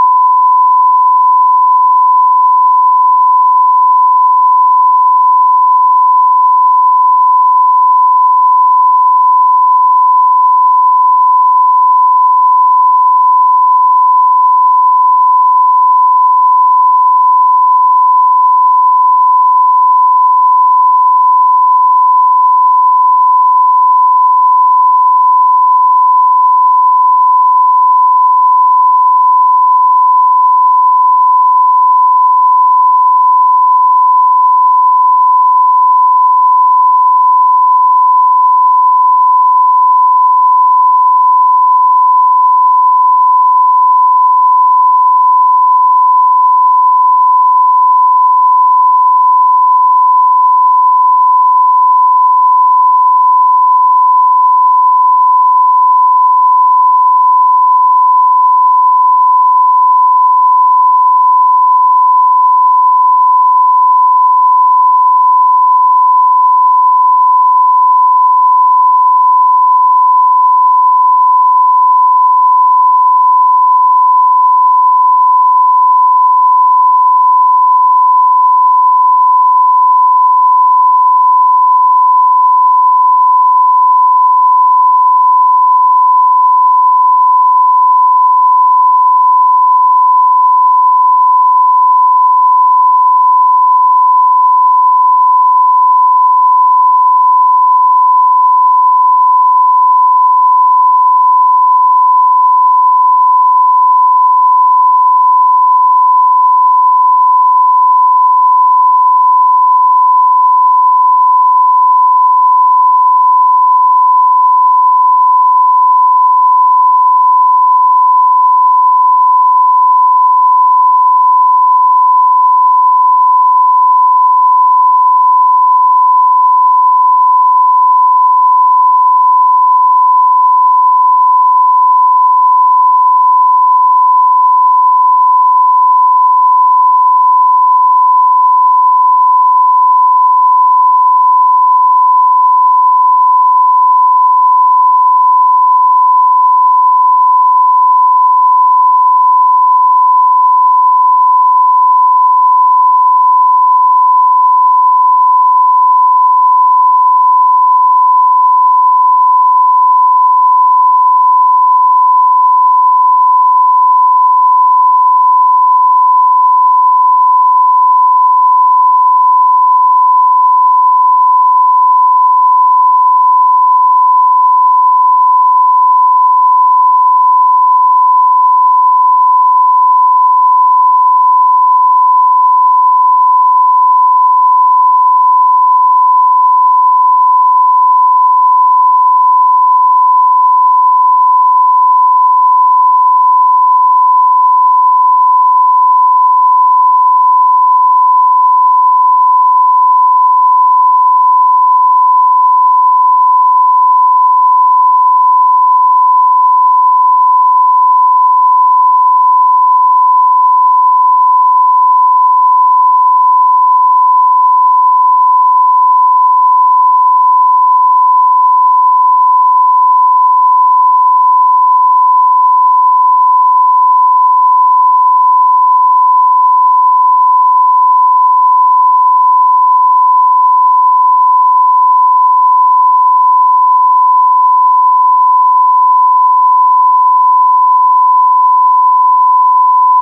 1004Hz 16k wave
synthetic, electric, sound